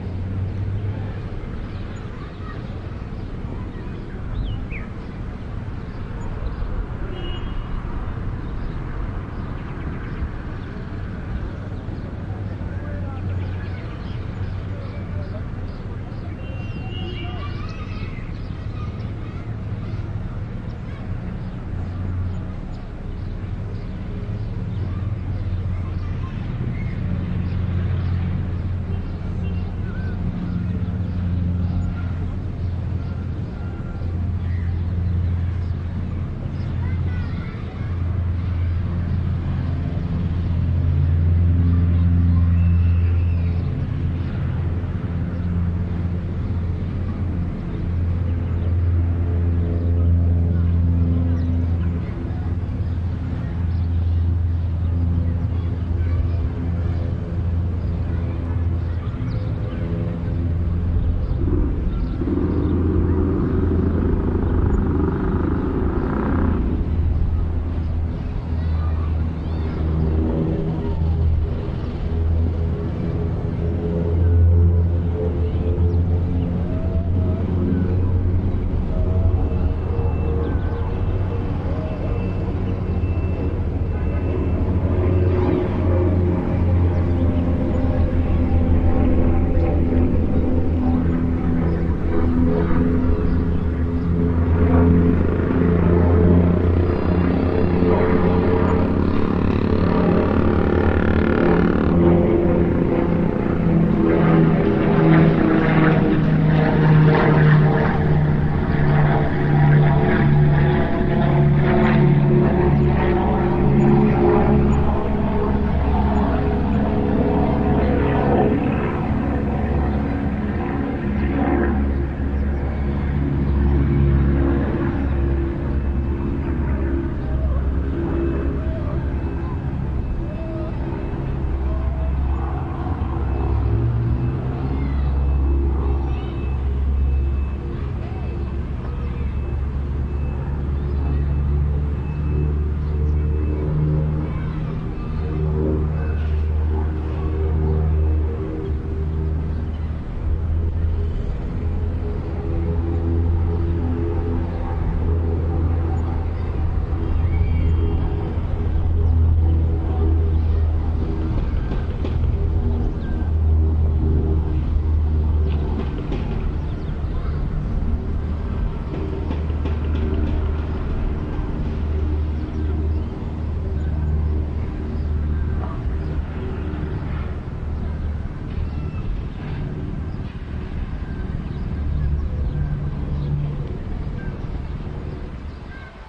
small town desert oasis distant throaty traffic dune buggy and small prop plane fly over approach circle and leave Huacachina, Peru, South America
America, desert, oasis, Peru, plane, prop, small, South, town